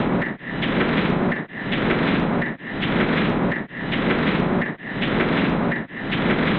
Remix of some old recording done last couple of years. Some guitar and drum stuff effected into a part of a 3 part repeating loop.
3 of 3.
Mac computer and audacity.
remix, Lo, puzzle, machine, wood, part, buzz, pulse, weird, block, repeating, freaky, electronic, system